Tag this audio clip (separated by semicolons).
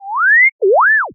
8-bit; arcade; atari; bfxr; chip; chipsound; game; labchirp; lo-fi; retro; video-game; whistle; wolf-whistle